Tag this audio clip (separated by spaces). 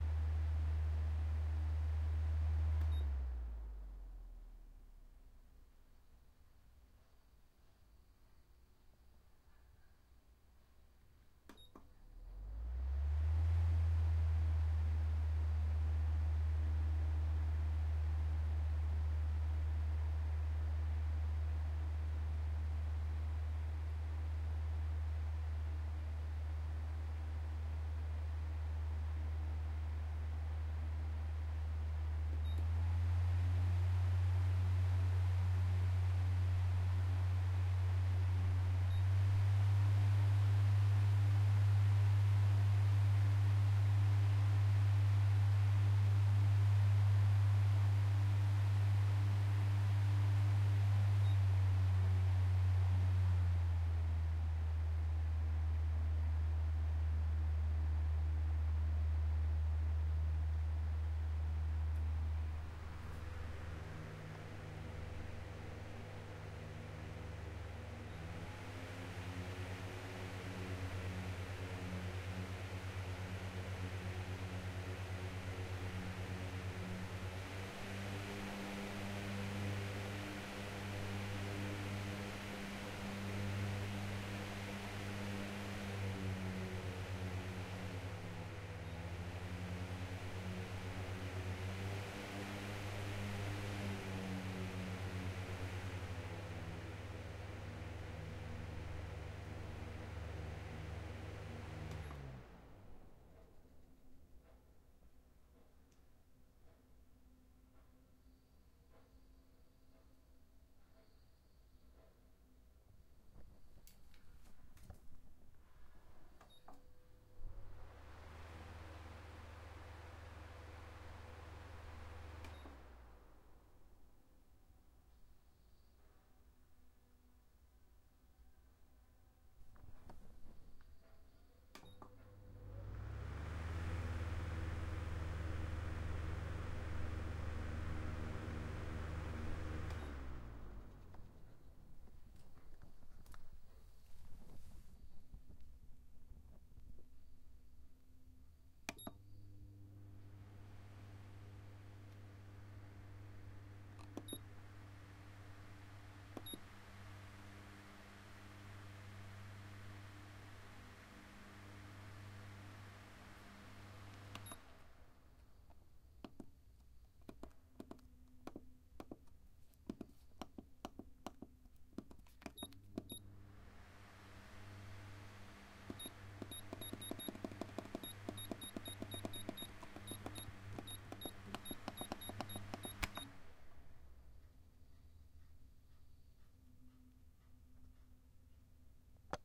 button turn speed electric off beep turning change fan switch speeds